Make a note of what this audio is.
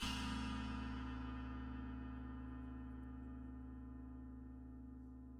China cymbal scraped.